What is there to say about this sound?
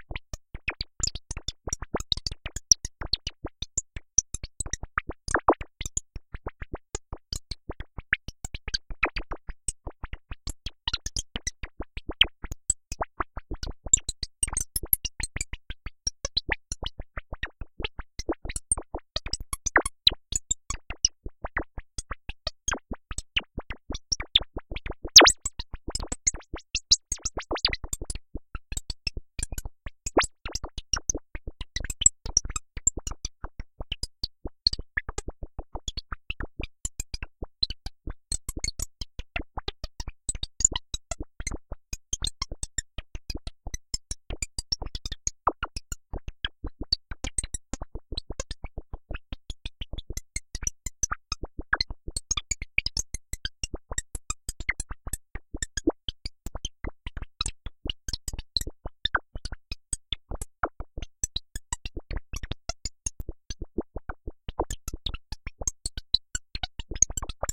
Computer talking through phase modulation in puredata